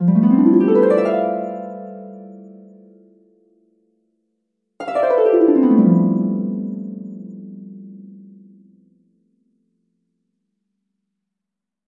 Harp Transition Music Cue
These harp sound effects I made can be used in many ways such as a transitional music cue if a character is thinking about something.
cue, dreamy, harp, movie, plucked-strings, thought